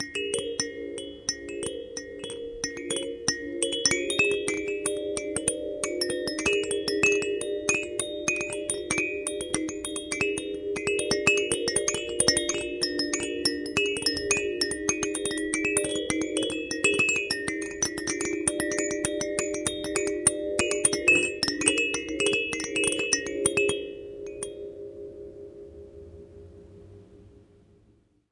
baby bell rattle 02
A baby bell/rattle. Recorded using a Zoom H4 on 12 June 2012 in Cluj-Napoca, Romania. High-pass filtered.
bell, child, rattle, toddler